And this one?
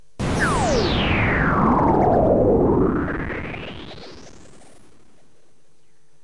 aika-avaruussiirto-time-space-transfer
Snynthetic sound used as a time-space transfer. Recorded with fostex vf16 and made with clavia nordlead 2 (three separate sounds mixed, which are also available separately)
imaginary, mix, scifi, synthetic